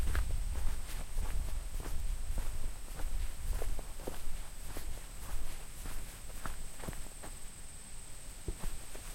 FOOTSTEPS ON GRAVEL
Footsteps walking on gravel outdoors in summer.
farm, field-recording, footstep, footsteps, gravel, outdoors, step, steps, summer, walk, walking